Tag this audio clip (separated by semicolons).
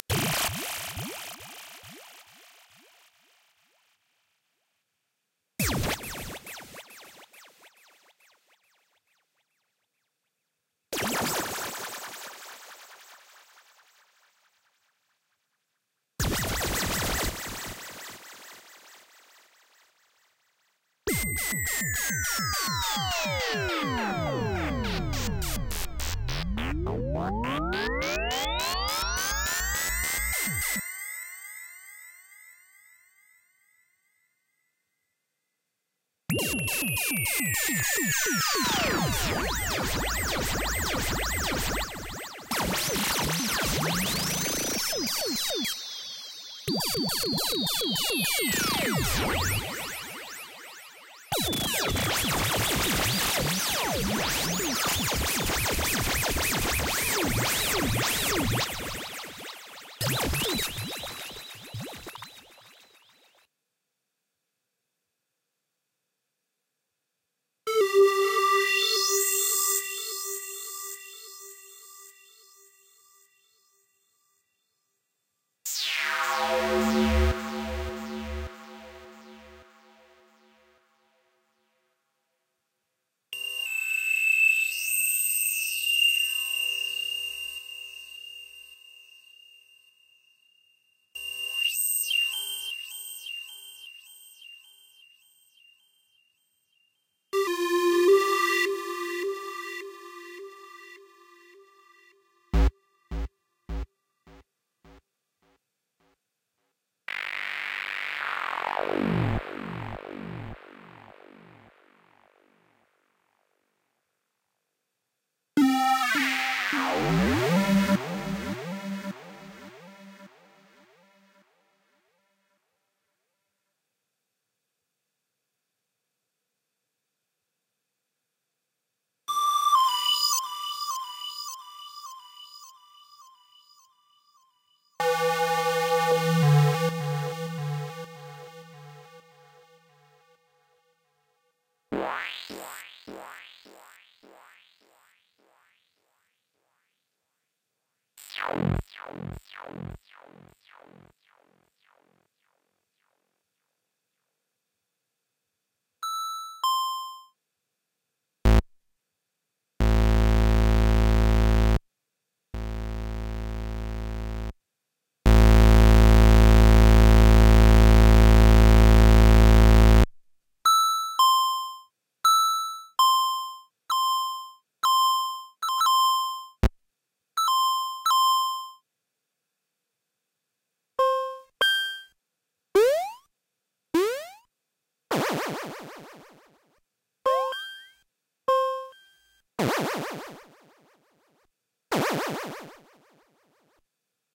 Synth; Psy; Korg; Kaossilator; Noise; Acid; Pro